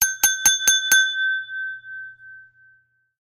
Toast Glas langsam
Banging a glass to announce a speech at a special occasion.
table, Glas, dish, Bang, Toast, glass, wedding, speech, hitting, banging, hit